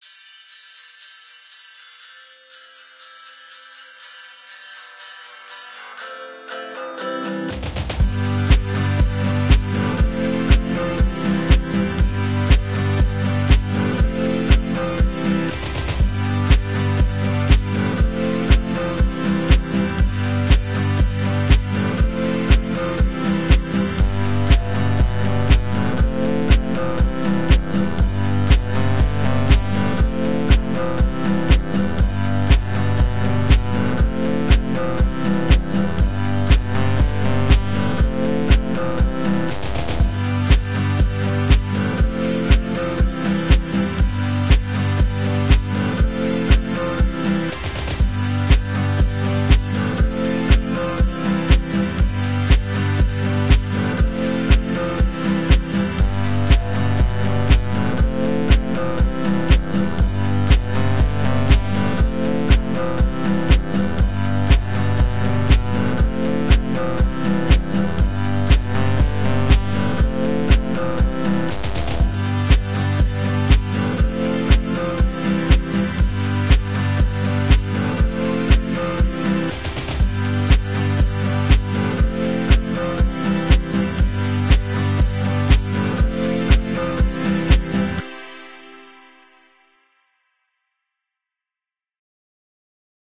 Guitar And Synth Loop
background, beat, rubbish, free, 130-bpm, drum-loop, loops, synth, beats, loop, guitar, groovy, music, quantized, garbage